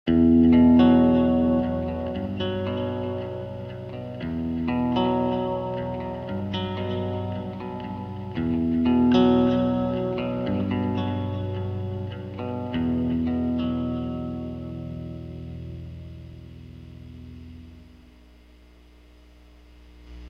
chordal meanderings 2
a little guitar move in E
ambient, chordal, guitar